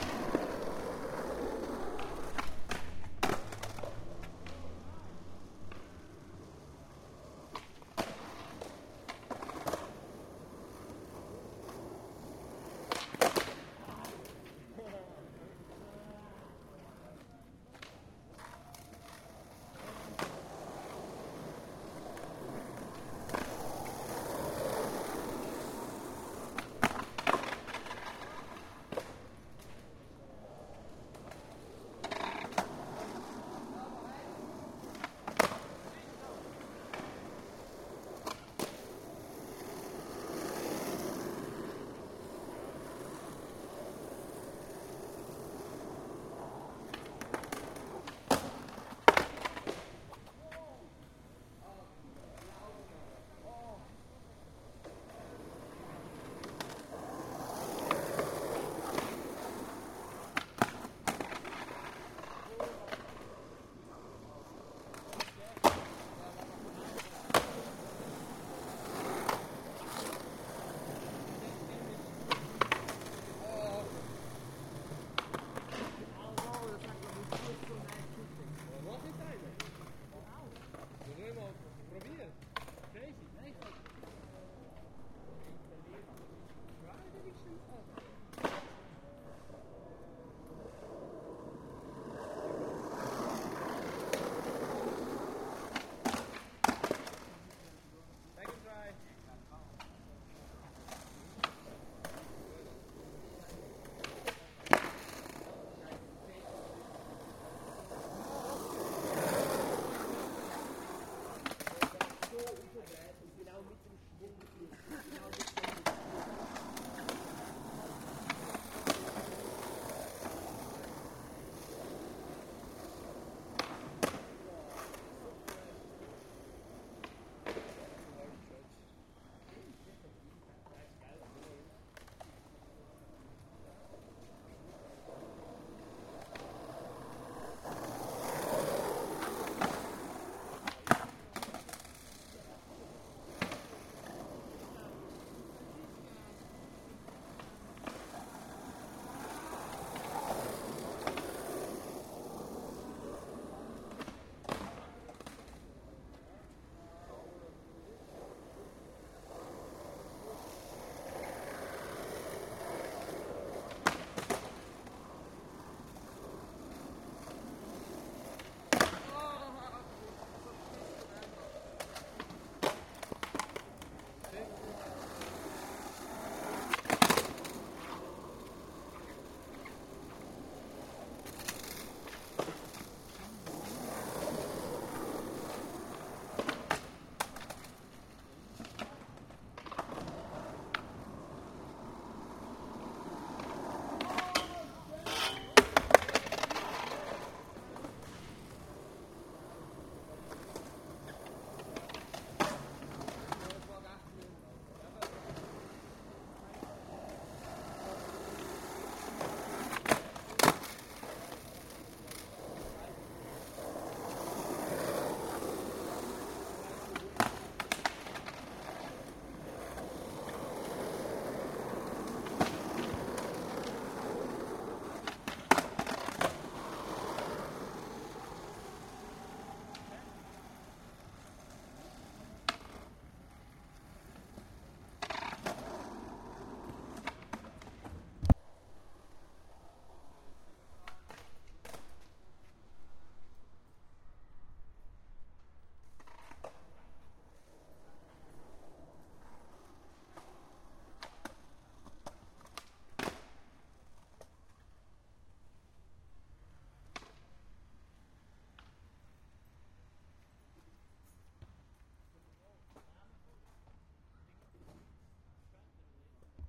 Skate Board Park Zurich
Zurich skate park is the audio recorded from a skate park near the Langstrasse area in Zurich city.